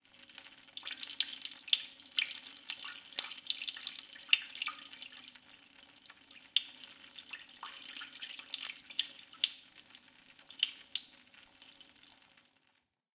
Sound of urination - Number 1